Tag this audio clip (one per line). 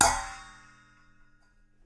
perc
cymbal
splash
percussion